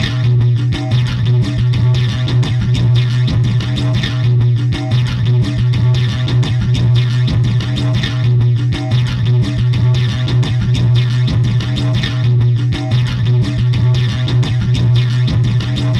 congo5guitar
acoustic, Drum, drums, guitar, improvised, loop, percussion